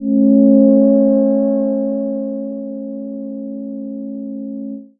minimoog vibrating C-4
Short Minimoog slowly vibrating pad